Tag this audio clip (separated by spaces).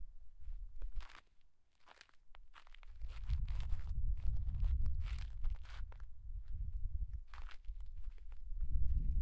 Crunchy Footsteps Snow